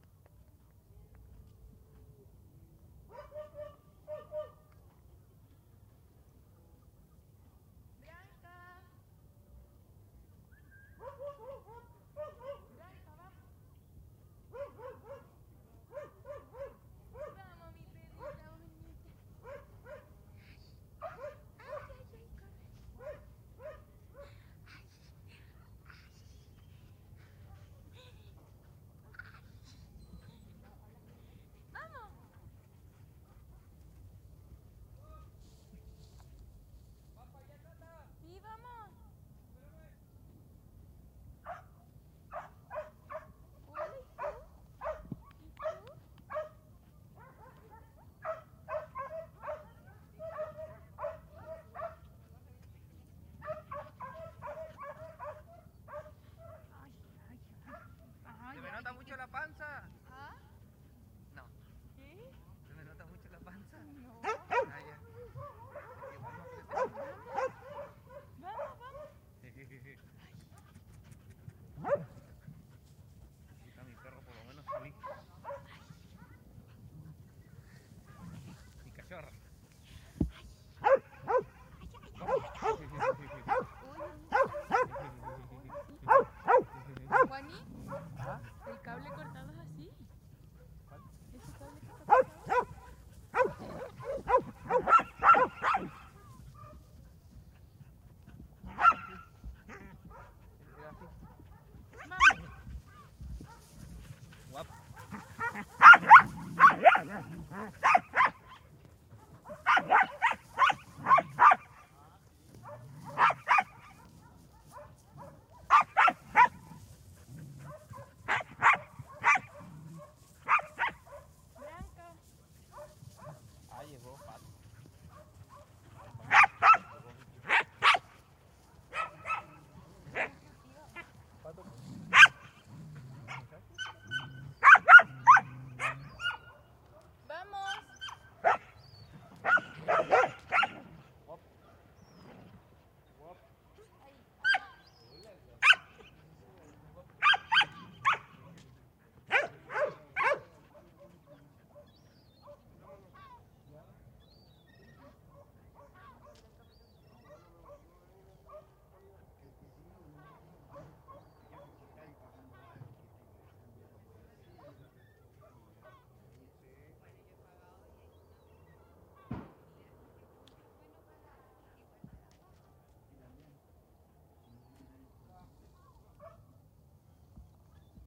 Dogs barking on a prairie
Some humans also call the dogs and talk some. The dogs are running and playing on a prairie the whole time.
Recorded with a NTG3 on a MixPre6.
barking, calls, dogs, whistles